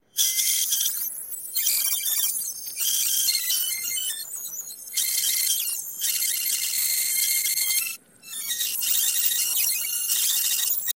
Rewind Music
A simulation of a reel to reel tape recorder being rewound with the heads still in contact with the tape.
backwards, reel-to-reel